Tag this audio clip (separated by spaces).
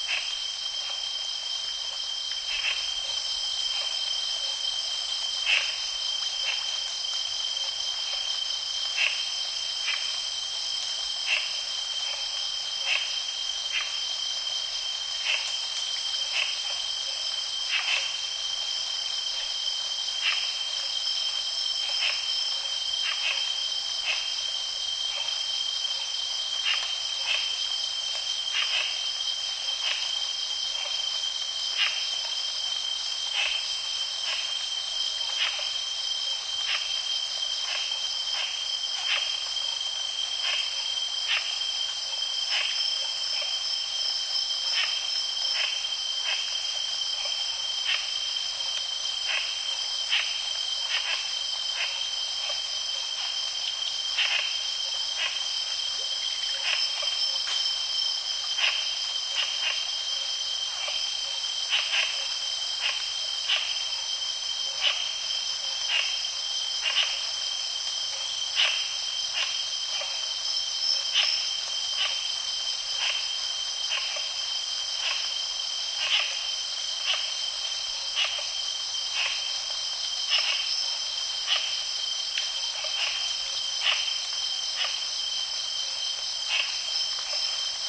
crickets field-recording birds night Thailand